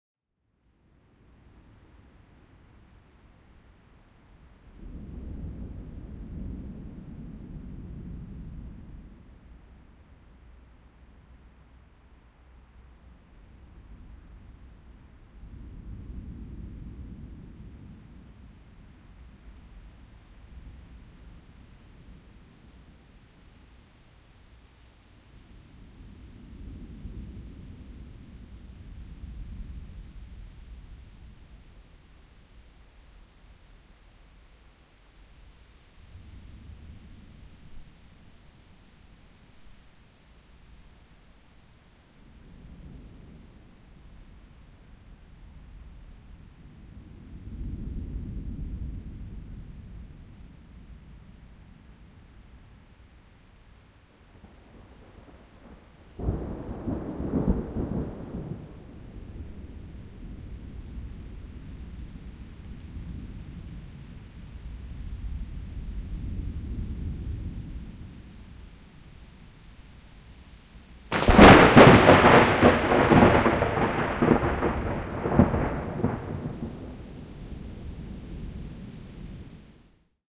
Learning the "tricks" to record thunder claps without clipping. MKH 30/40,SD 722
field-recording, thunder